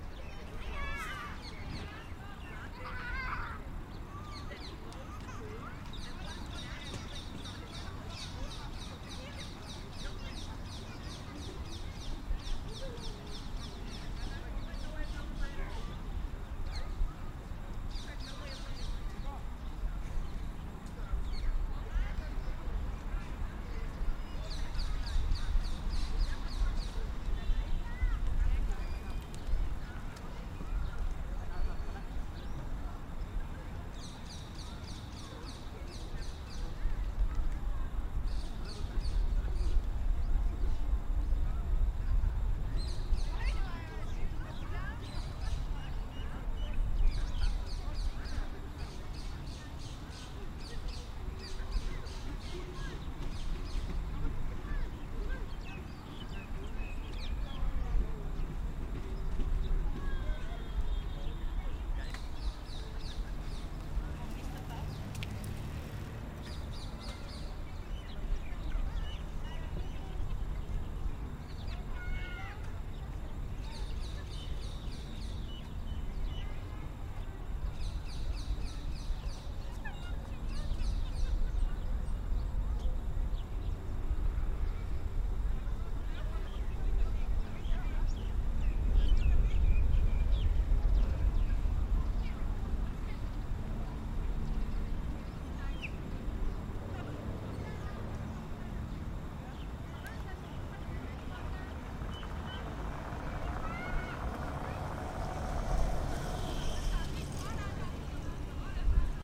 city park in Tel Aviv Israel